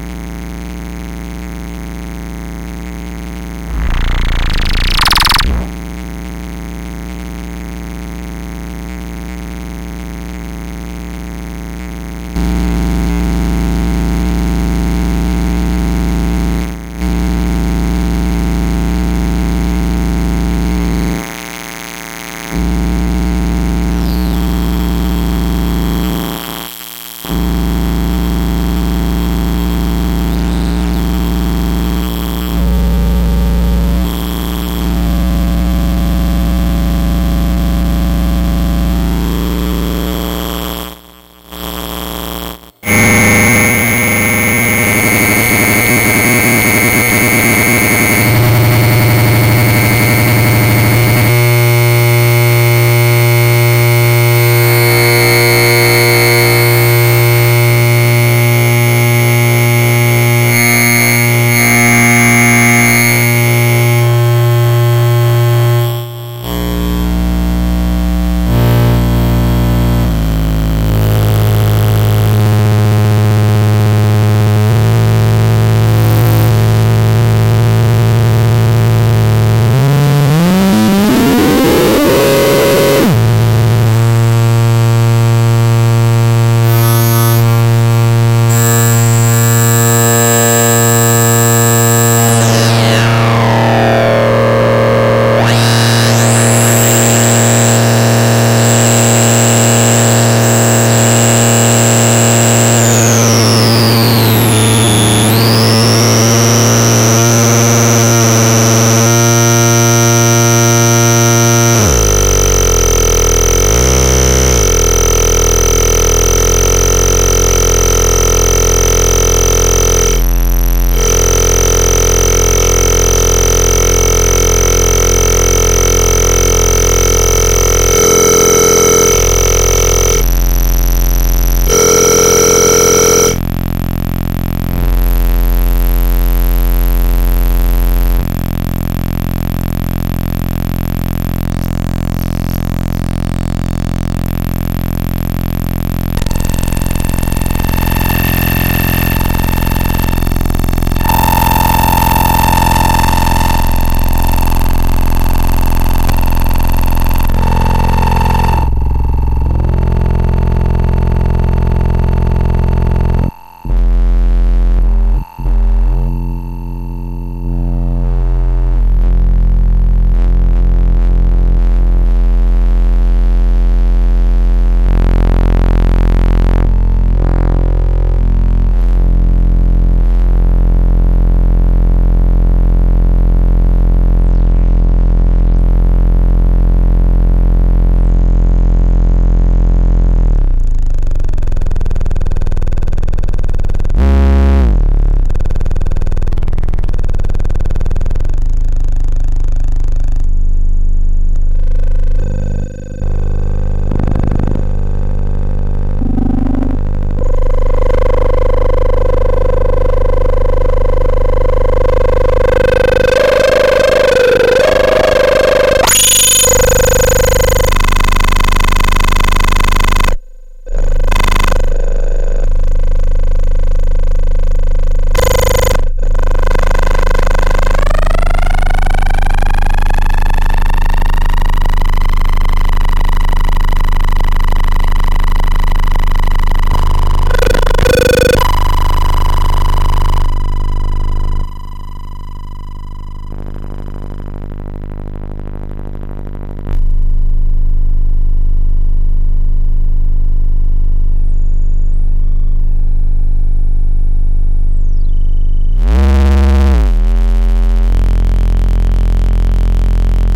Analog goodness, long loop example
fr-777, retro